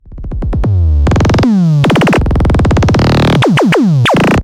creasy beat
create whit make noise 0-coast synth
creasy, effect, kick, rolling